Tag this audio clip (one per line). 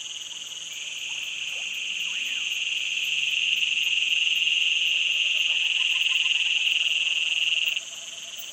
field-recording
nigth-birds
tropical-forest
brasil